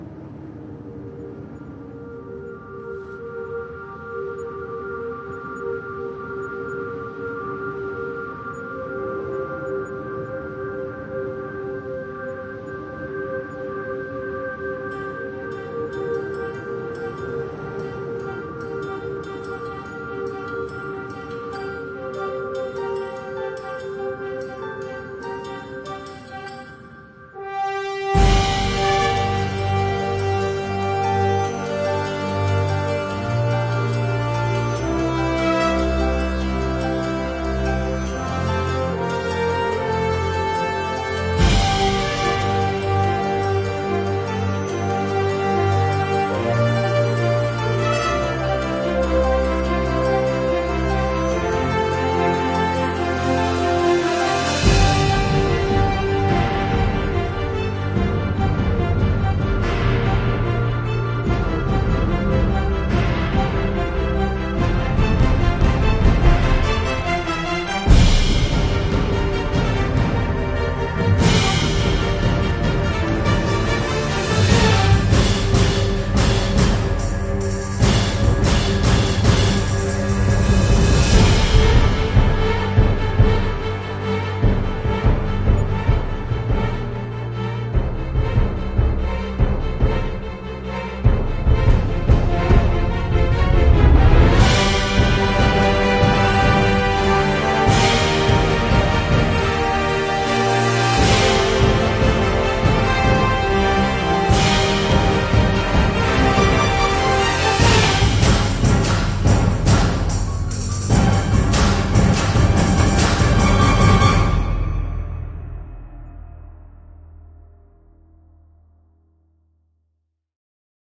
Arctic Orchestral Cue
An epic orchestral cue with an arctic film trailer aesthetic. Suitable for a film / video game trailer or a scene that calls for alternatingly moody and epic sound direction.
Huge drums, soaring french horn, and a brass/string ensemble to weave it all together.
145bpm